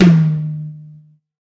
tom classic 1
a dw tom set recorded with a Shure SM57 mic
on WaveLab added 2 harmonic sets 2 time [one harmonic set of two is consisted of one octave up and after 3 ms two octaves up but at a lower volume] are added after 7 ms from the attack maximum peak and again a bit lower after 12 ms from the latter double harmonic.
Very classic tom harmonics for toms. A must have mainstream.
drumkit, tom, drum, tom-tom